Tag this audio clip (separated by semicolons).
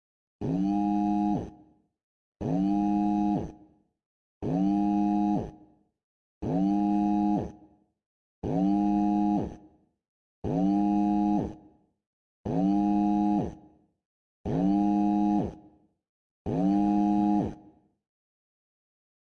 telephone; phone; vibrating; iPhone; vibrate; vibration